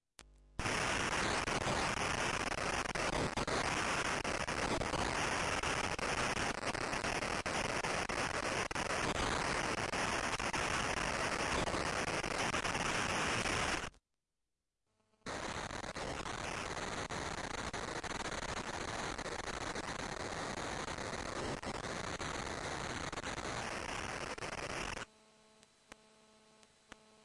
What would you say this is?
Computer Signal Interference Noise: SCP + Wifi aka Wlan
When I use the analog audio output right next to the usp port to which my usb wifi key is connected, these sounds occur if I at the same time run the `scp` program. Curious, no?
computer, pc, wlan, wifi, system, noise, hacker, operating